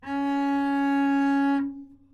Double Bass - C#4
Part of the Good-sounds dataset of monophonic instrumental sounds.
instrument::double bass
note::C#
octave::4
midi note::61
good-sounds-id::8666
Csharp4, double-bass, good-sounds, multisample, neumann-U87, single-note